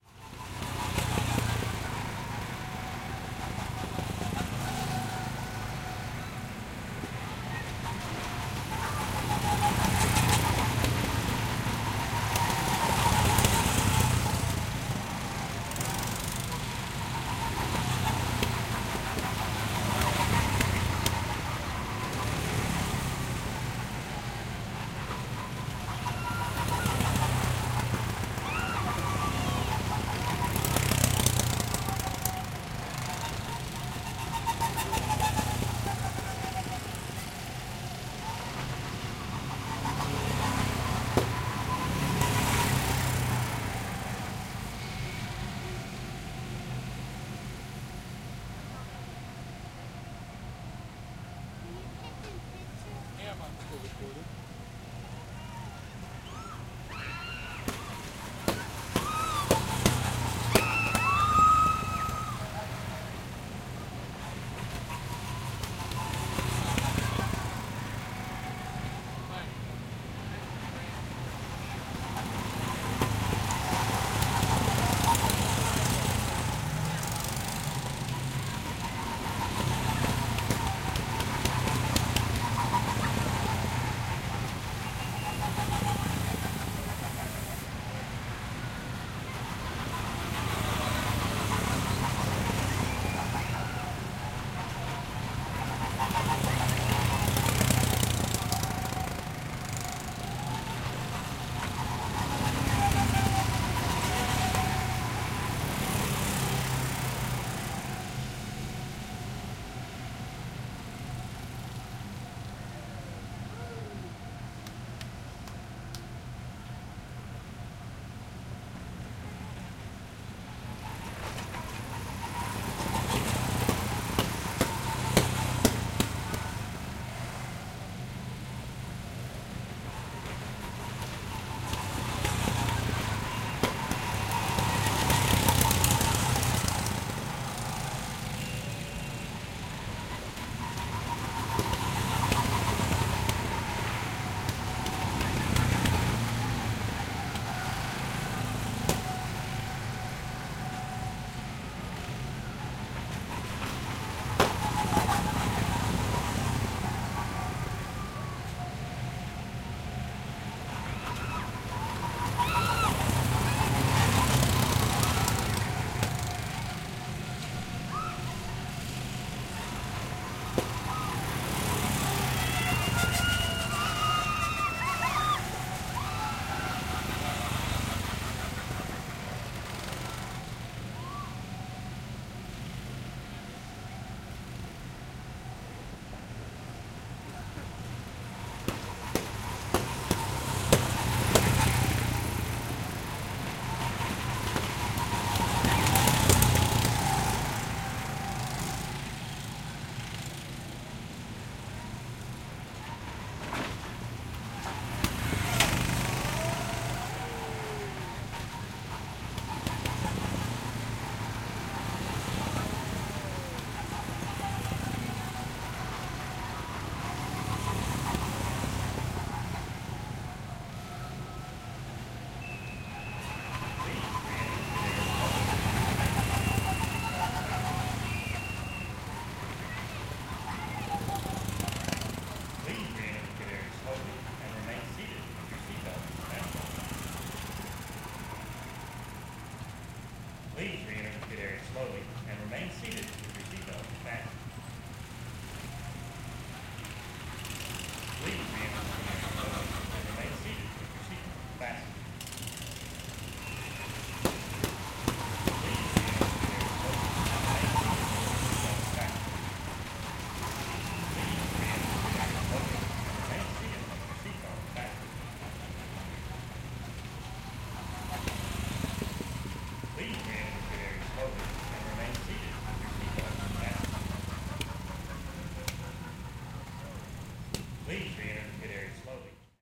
Go Kart Racing Outdoors - Moderate Traffic - Sparse Crowd Noise
Recorded next to a go kart race track with moderate kart traffic and sparse crowd noise.